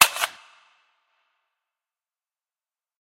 M4 mag dump 2
A Bushmaster's magazine being removed.